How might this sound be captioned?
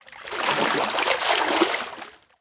Water slosh spashing-2
environmental-sounds-research, water